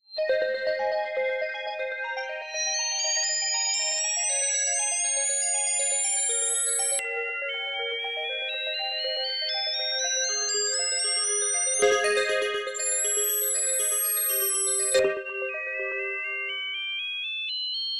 ARP B - var 2
ARPS B - I took a self created Bell sound from Native Instruments FM8 VSTi within Cubase 5, made a little arpeggio-like sound for it, and mangled the sound through the Quad Frohmage effect resulting in 8 different flavours (1 till 8). 8 bar loop with an added 9th bar for the tail at 4/4 120 BPM. Enjoy!
sequence, 120bpm, arpeggio, melodic, bell